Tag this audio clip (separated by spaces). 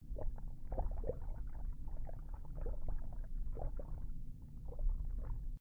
hydrophone submerged underwater